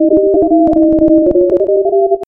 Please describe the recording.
Robot sound created outta boredom
galaxy, futuristic, robot, spaceship, space, android, alien, cyborg, intelligent